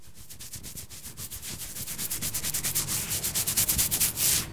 I'm scratching my head. Recorded with Edirol R-1 & Sennheiser ME66.